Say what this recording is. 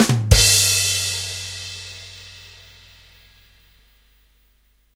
The drum fill for when somebody tells a joke in a stand-up comic or a show.